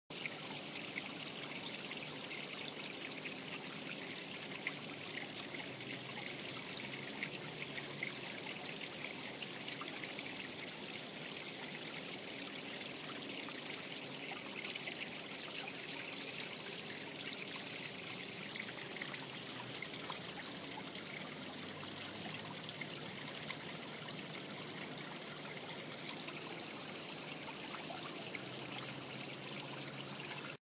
Field recording of stream flowing.
liquid, flow, creek, field, nature, relaxing, river, water, flowing, brook, babbling, recording, stream, field-recording, trickle, ambient, running